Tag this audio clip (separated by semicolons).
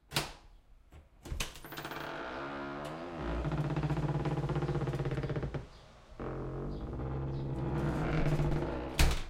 creaky; door; noisy; outside